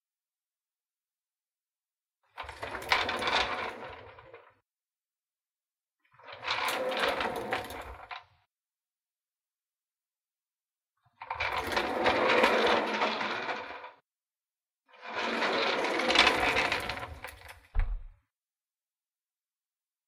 Hyacinthe rolling desk chair on tile edited
rolling desk chair on tile